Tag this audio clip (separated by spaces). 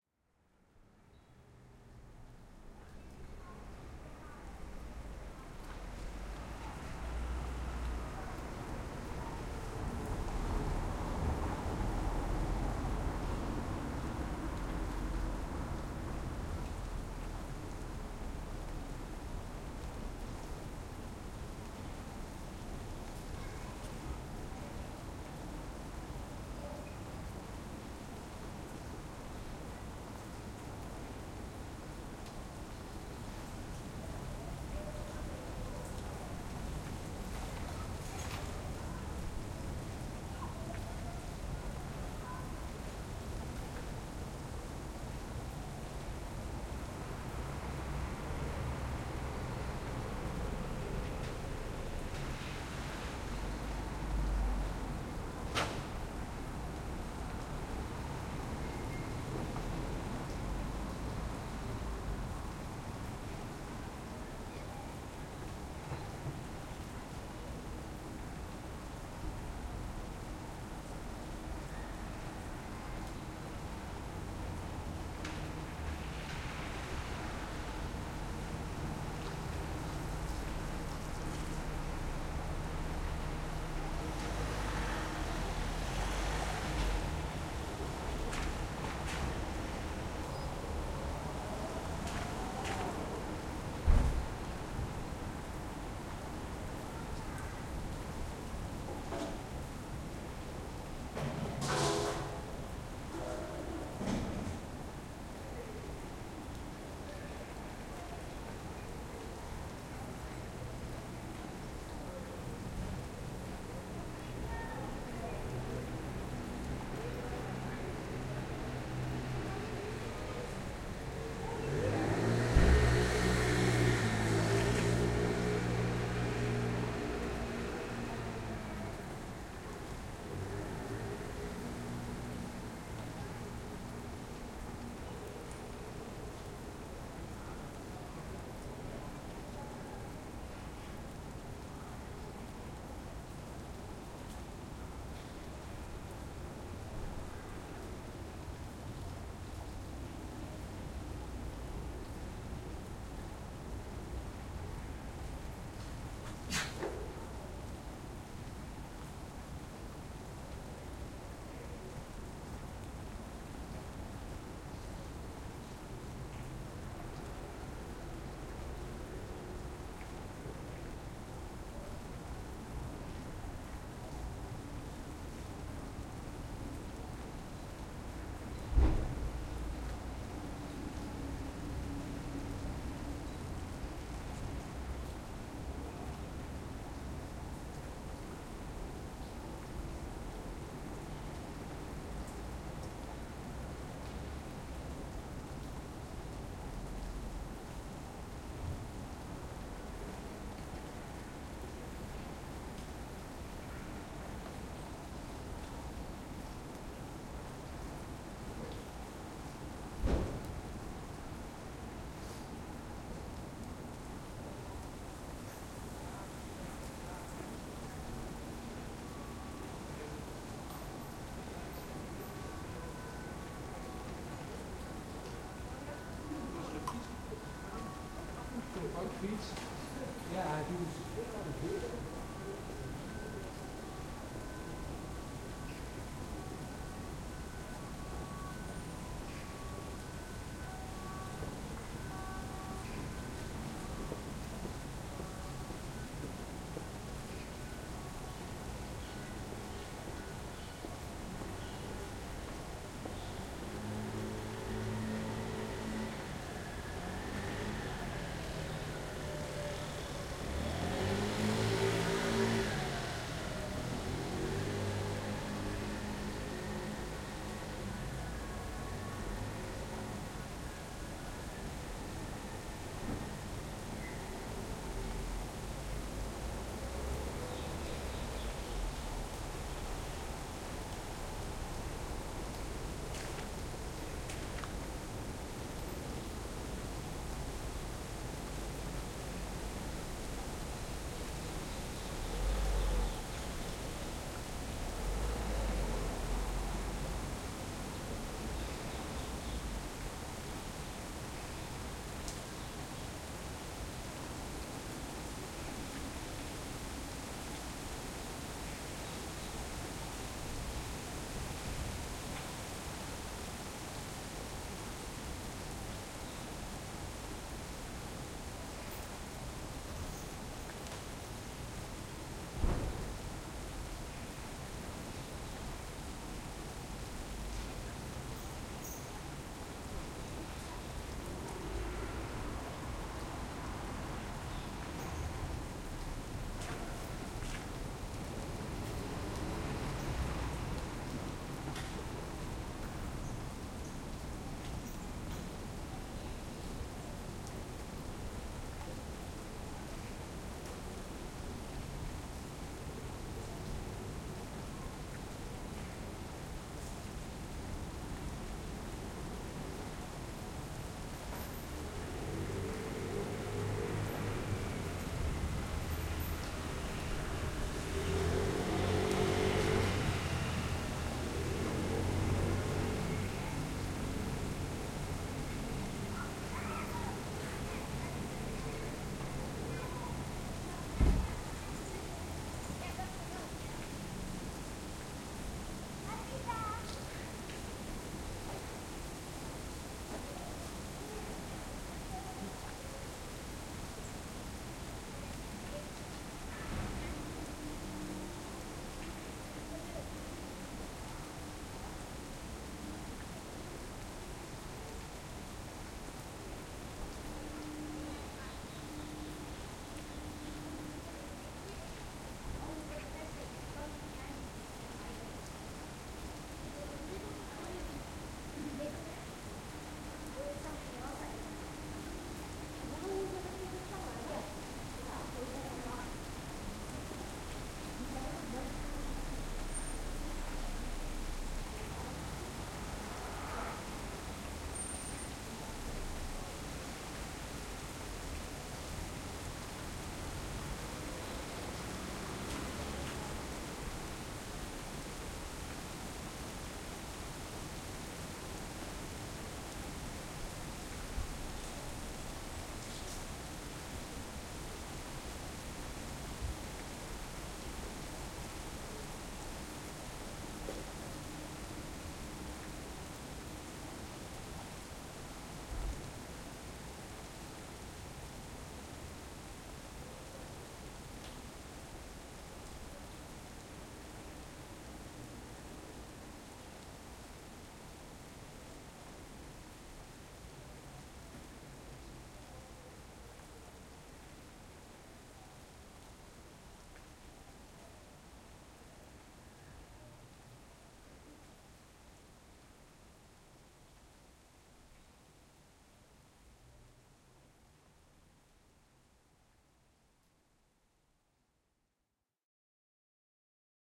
ambiance ambience ambient Amsterdam atmo atmos atmosphere atmospheric background background-sound city down-town field-recording general-noise Holland ms ms-stereo noise people rainy soundscape stereo street weather white-noise wind